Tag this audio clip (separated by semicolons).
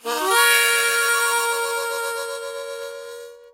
ab; harmonica; key